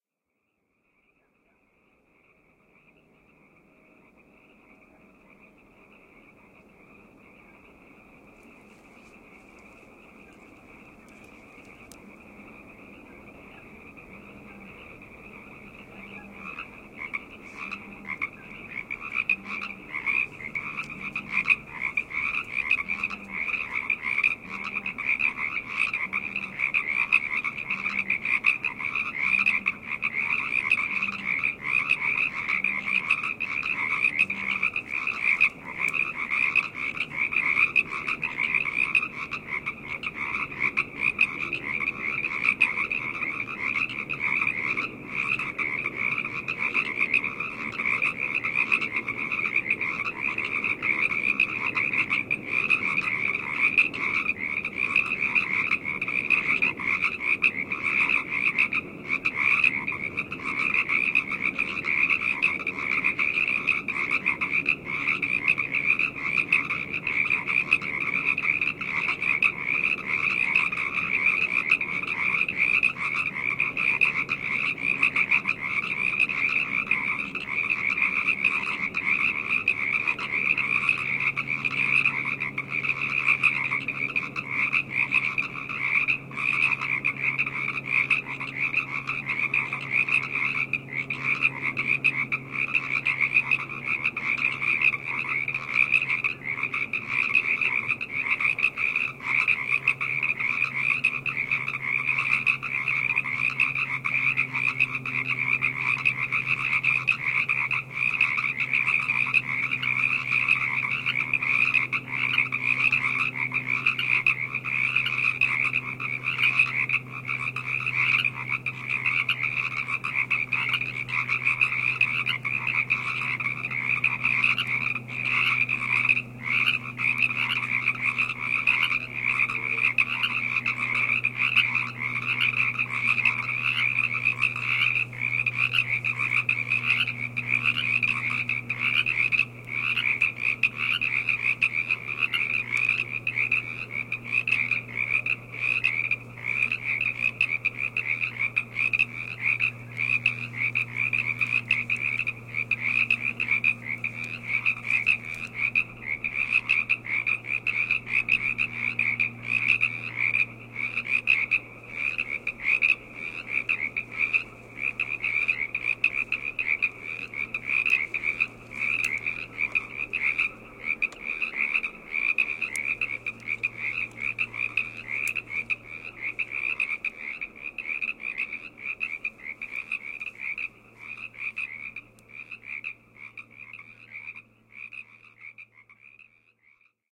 Recorded March 4th, 2010, just after sunset.